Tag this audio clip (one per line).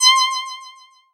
8bit
retro
games
button
cat
arcade
computer
video
cats
nintendo
video-game
game